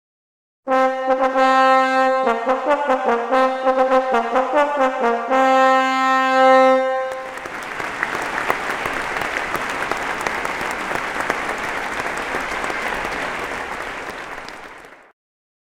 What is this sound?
20100128.fanfare.and.applause
This sample mix was made as an accompaniment for a sculpture entitled 'The Artist', by Curro Gonzalez, exhibited at Centro Andaluz de Arte Contemporaneo (Seville, Spain)
fanfare, mix, trumpet, welcoming